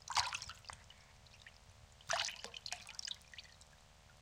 Hand playing in water